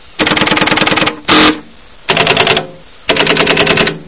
BT Strowger Stepping